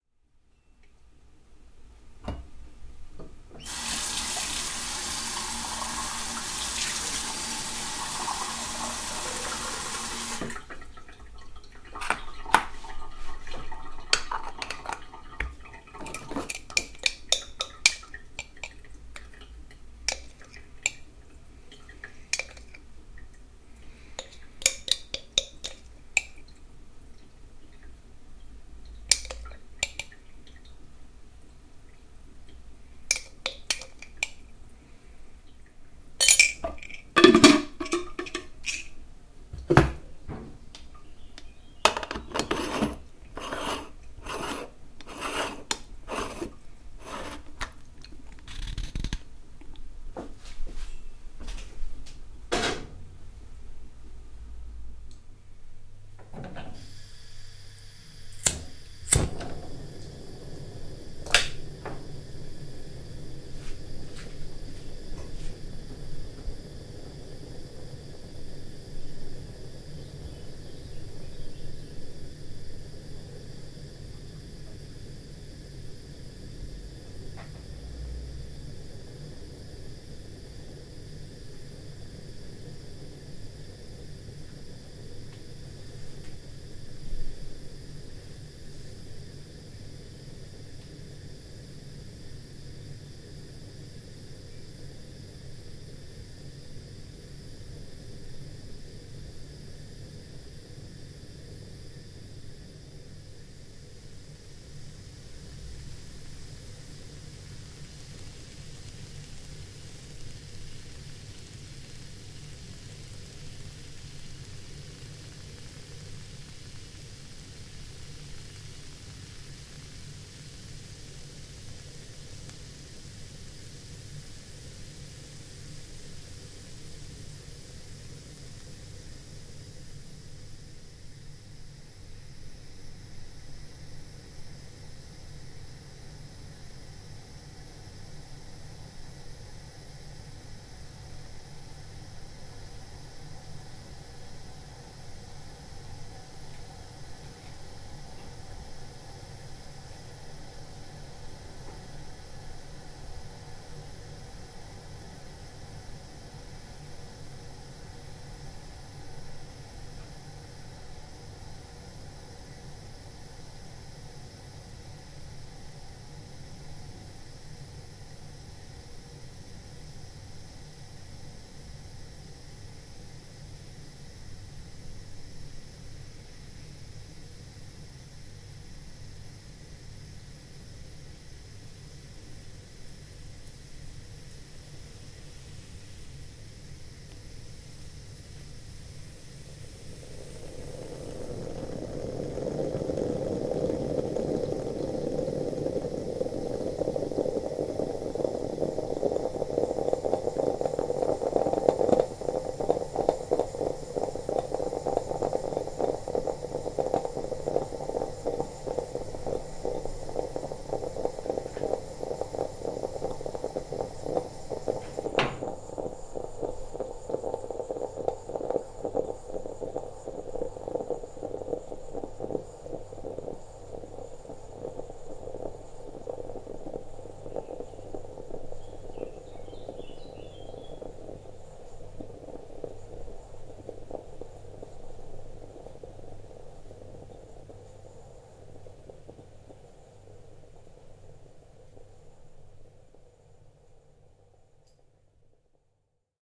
Italian coffee maker moka complete preparation
Complete preparation of a coffe with an italian Bialetti coffee-maker